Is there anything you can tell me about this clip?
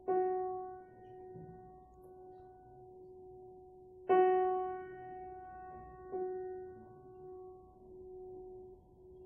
Piano Note 1
F-sharp piano.
{"fr":"Note de Piano 1","desc":"Un Fa dièse joué au piano.","tags":"piano fa diese note musique instrument"}